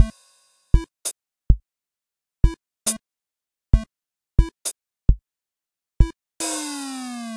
A laid back hip hop beat ready for looping. Mixed with Audacity, deep drum is risset drum from Audacity, tones are generated by Audacity, and hi hat hits are recorder through a midi player. About 120 bmp, but not exactly. The beat isn't exactly on beat, which produces the laid back feeling.